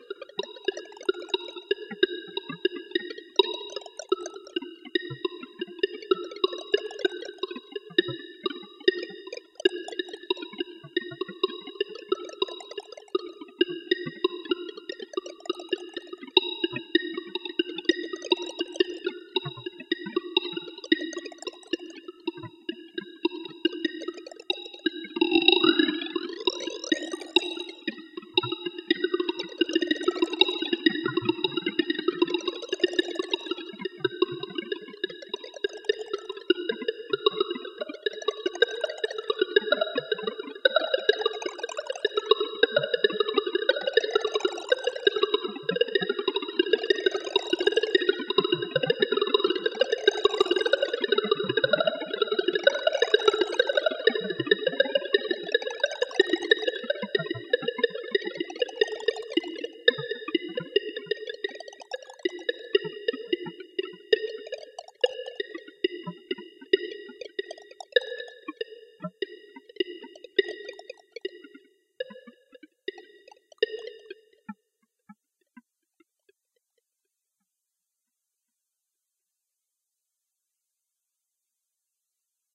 Basic treatment of voice with csound algorithm
csound, synthesis, granular, experimental